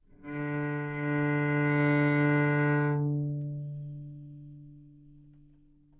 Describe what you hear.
Part of the Good-sounds dataset of monophonic instrumental sounds.
instrument::cello
note::D
octave::3
midi note::38
good-sounds-id::376
dynamic_level::mf
Recorded for experimental purposes